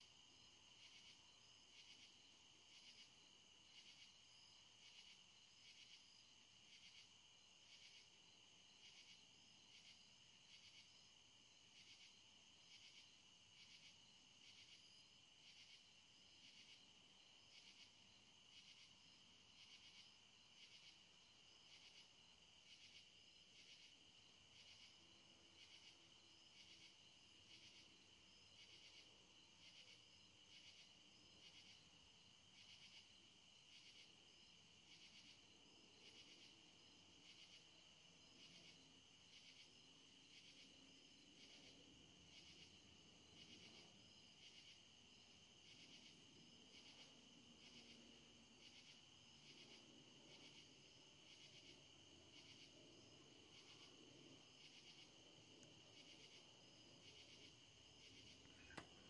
NIGHT AMB 01
night, valley, road, noise, sounds, crickets